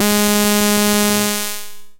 Basic impulse wave 1 G#3
This sample is part of the "Basic impulse wave 1" sample pack. It is a
multisample to import into your favourite sampler. It is a basic
impulse waveform with some strange aliasing effects in the higher
frequencies. In the sample pack there are 16 samples evenly spread
across 5 octaves (C1 till C6). The note in the sample name (C, E or G#)
doesindicate the pitch of the sound. The sound was created with a
Theremin emulation ensemble from the user library of Reaktor. After that normalising and fades were applied within Cubase SX.
impulse, basic-waveform, reaktor, multisample